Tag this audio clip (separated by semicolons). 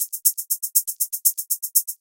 electronic; hi-hats; loop